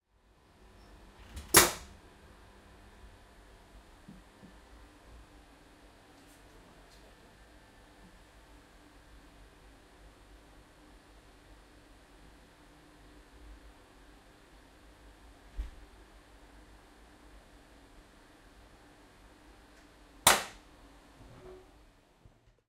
Recording of the doors releasing and locking while at a station on a tilt train.
Recorded using the Zoom H6 XY module.